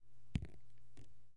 Water On Paper 11
Drops on paper.
water, paper